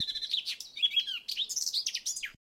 birds chirping in a forest
bird, bird-chirp, bird-chirping, birds, birdsong, field-recording, forest, forest-birds, nature